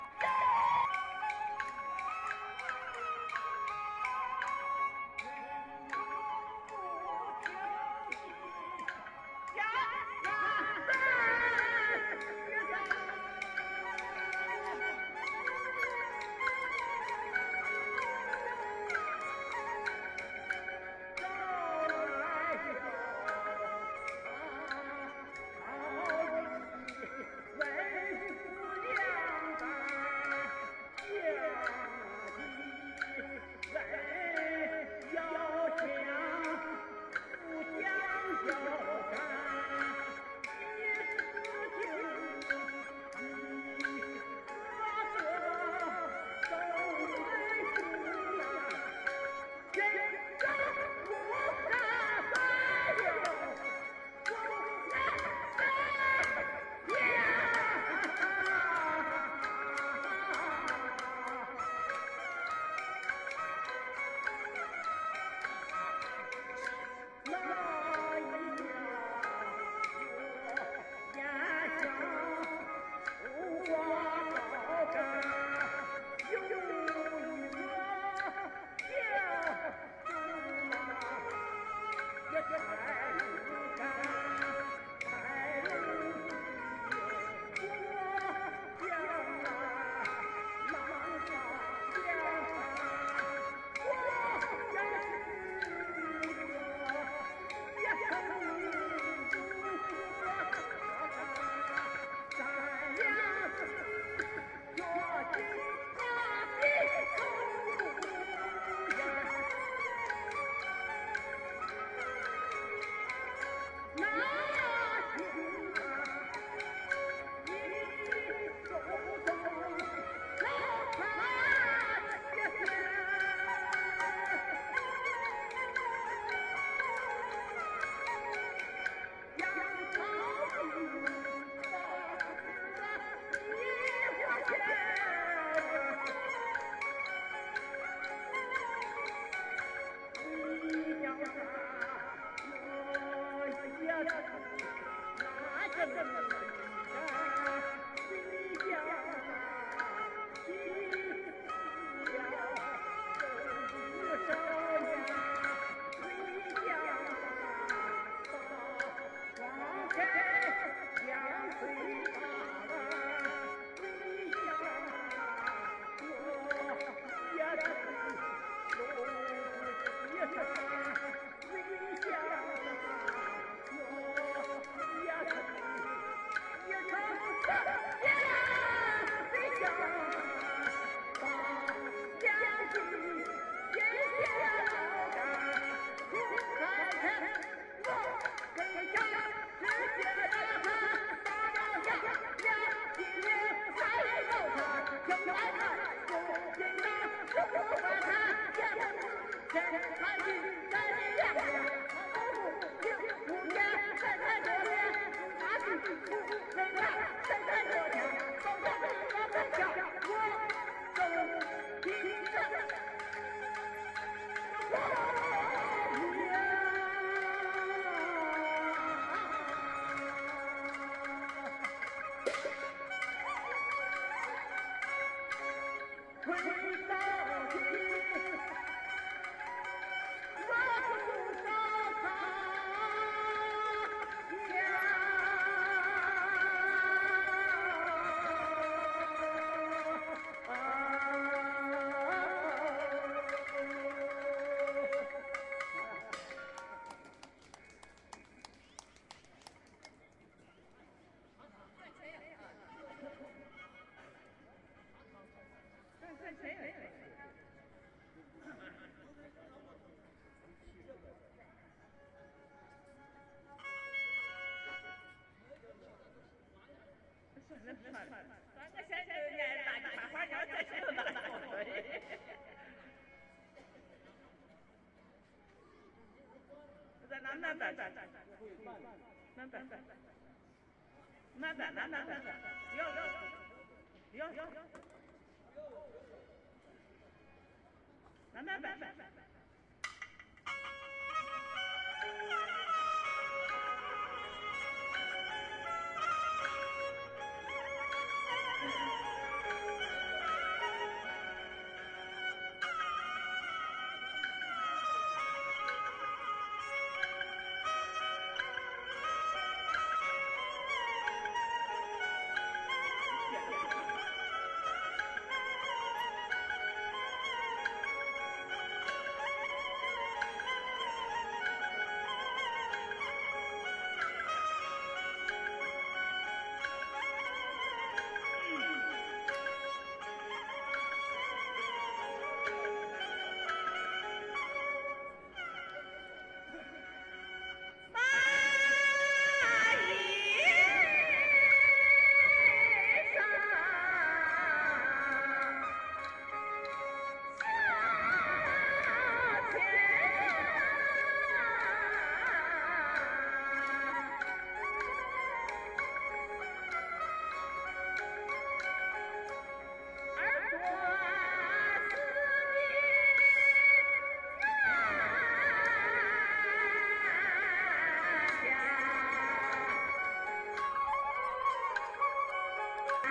Xaanxi singers
Amateur opera singers and musicians performing traditional Shannxi opera in Changle Park, Xi'an, Shaanxi Province, China. They often play there on weekend afternoons while families have fun at the nearby goldfish ponds and crafts tables. Recorded October 16, 2011 using a Zoom H2.